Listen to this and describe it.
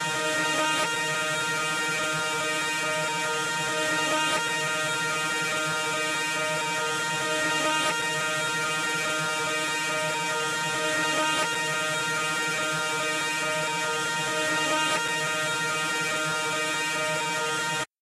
Guitar loop reverse 2
Using the looper on the POD again. Effects used are harmonizer, delay, reverse looper, pitch shifter, a little amp overdrive.
delay, guitar, loud, noise, scream